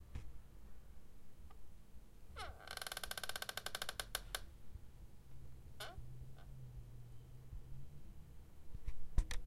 Stepping on a creaky floorboard
floor, creak, creepy, creaky, haunted, Halloween, floorboards, horror, boards, scary, board